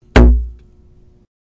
there is no sound-in-itself. this is one of the primary lessons of musique concrete. Schaeffer, of course, was unable to comprehend this point; but we can, by exploring the field his work helps opens up with new tools and concepts, put his insights beyond his own limitations.
this is to be done both philosophically and methodologically.
philosophically, this is to be done by reading him through queer cyborg feminism and genderfuck Marxism.
methodologically, it involves experimenting with different movements and microphone positioning.
Cone Bass Distorted was created with the same cone, microphone and 'drum stick' that I made 'Cone Bass Clean' with. The cone was hit much harder and closer to its base; the microphone was stuffed farther up the cone's resonating chamber.
experiment with the material organization of an object's resonating chamber. think the microphone as creatively distorting.
ambience, city, cyborg-politics, drum-kits, field-recording, urban